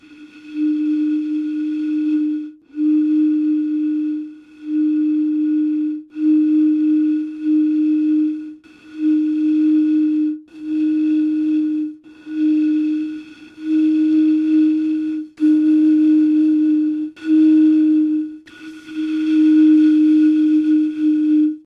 Jones Natural SomeWater
The sound of blowing on one of the "Jones Naturals" bottles, about a fourth full of water.